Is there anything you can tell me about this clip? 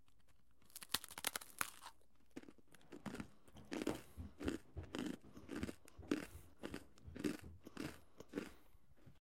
Pretzel Crunching
Chewing on a hard pretzel.
crunching, chewing, pretzel, hard